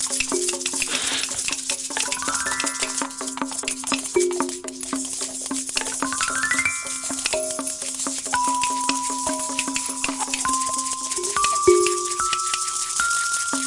Lots of toys
What my house sounded like today whilst making a pack.
instrument, kid